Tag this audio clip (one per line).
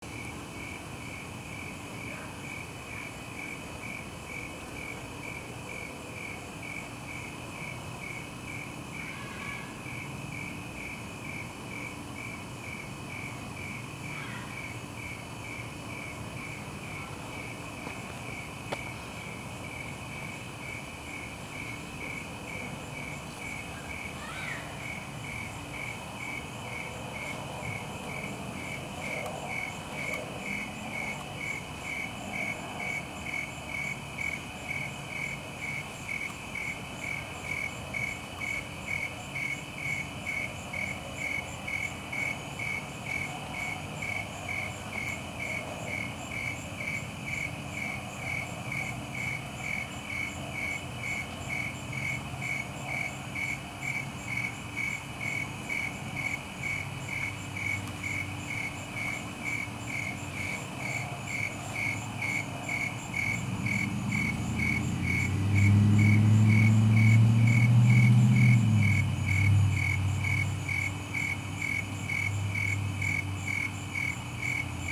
frog-peeps
Night-garden-noises
night-in-farm-country